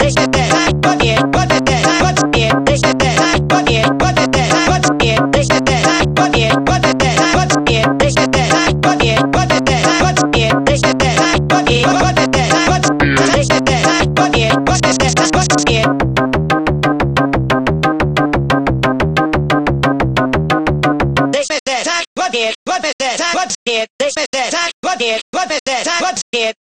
Tribute to Weasel Buster Tribe (kickbass without kick music with a sliced voice)